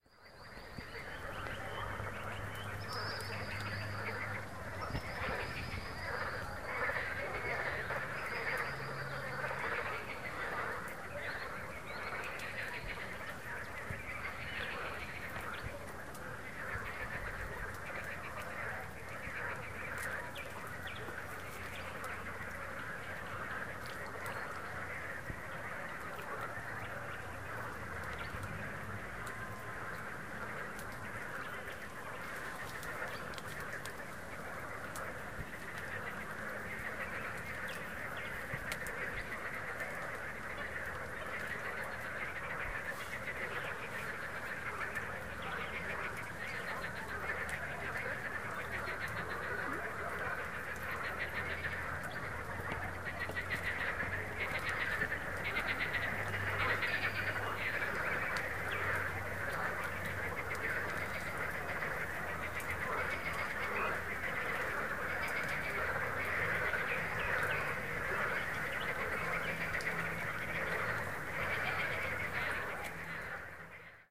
River Don frogs and birds
7Pm on a sping day at Vioshenskaya Embankment, Don Region, Russia
Birds, Field-recording, Forest, Nature